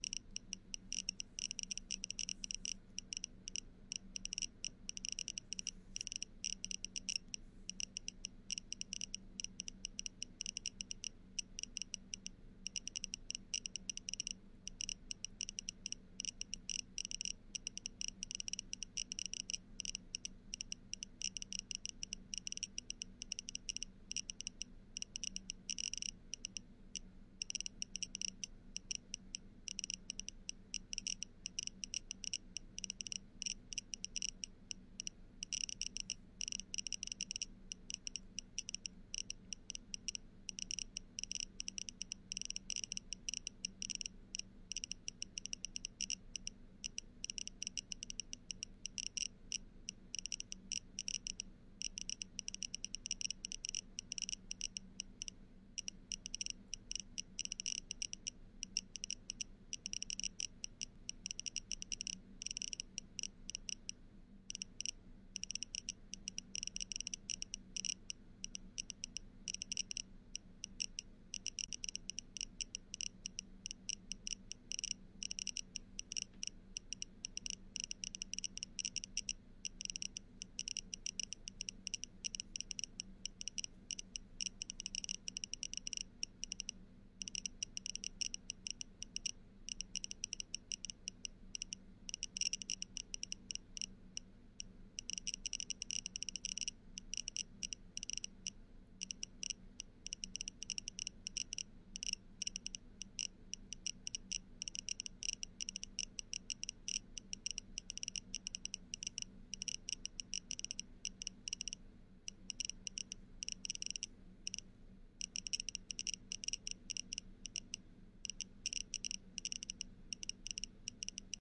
Geiger Tick Low
A Geiger counter ticks at low level radiation.
Radiation Detector: Mazur Instruments PRM-9000 (analogue ticking)
Beep, Geiger, Geiger-Counter, Radiation, Radioactive, Tick